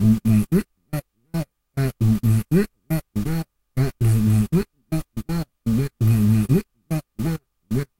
Beatboxing recorded with a cheap webmic in Ableton Live and edited with Audacity.
The webmic was so noisy and was picking up he sounds from the laptop fan that I decided to use a noise gate.
This is a throat bass loop. The gate adds a bit of a strrange envelope to the sounds, and some noise still comes through. Also, I am not sure this loop has the tightest tempo.
Defenitely not the best sample in the pack, but still decided to upload it, in case it is of use for someone.